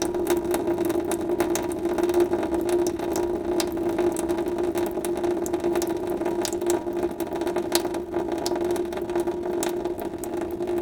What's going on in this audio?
Kitchen water tap dripping into sink. Sennheiser MKH-60 -> Sound Devices 722.